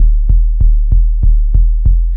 I sampled a kick and then i used a bass amplification.
bass, kick